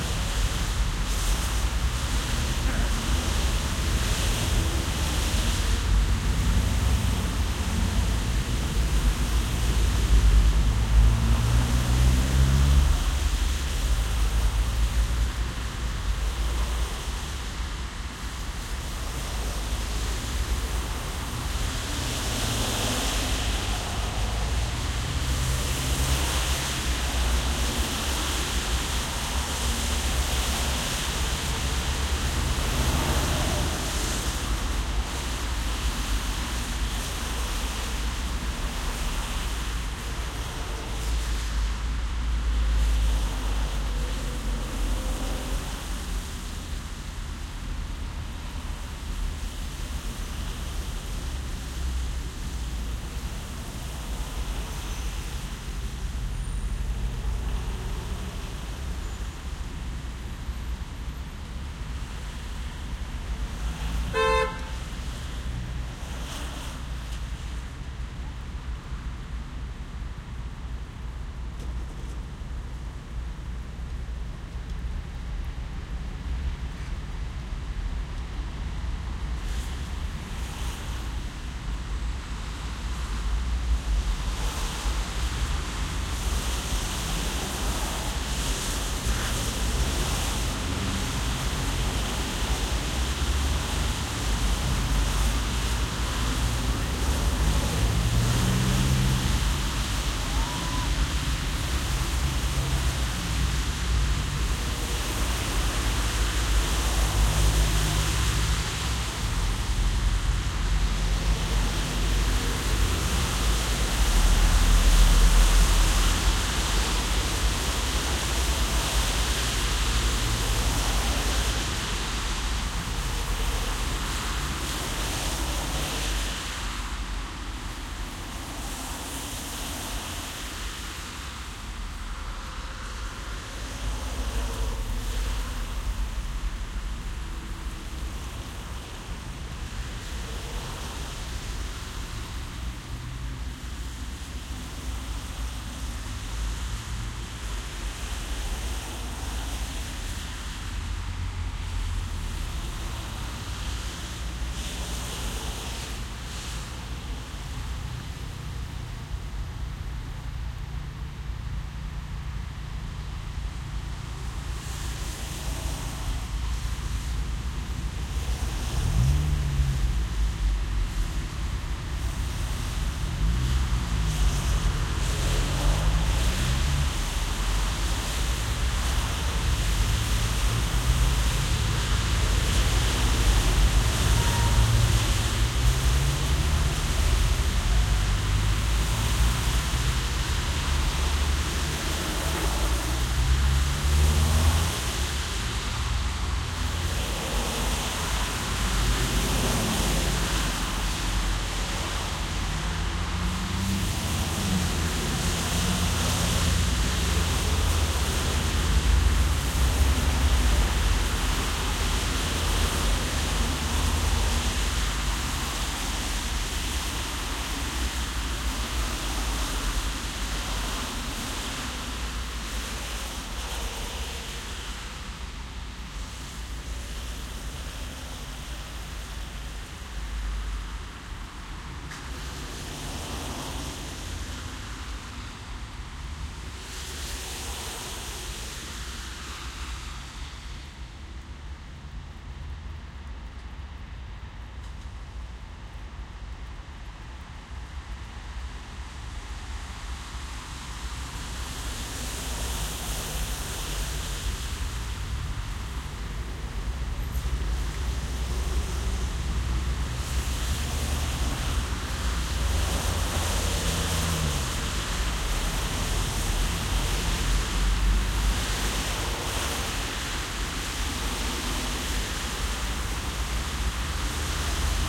Loud traffic on wet 6-lane street, cars are starting and stopping when lights turn red/green
Lots of cars are passing the microphone from side to side on a wet 6 lane street. with nearby traffic lights turn red or green they start or stop.
Recorded with a ~30cm AB pair of Neumann KM183 Microphones on a Zoom H4.
fast, road, city, passing, cars, traffic, rain, streetlights, street, hamburg, horns, loud, wet